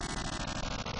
Sequences loops and melodic elements made with image synth. Based on Mayan number symbols.
sound, sequence, loop, space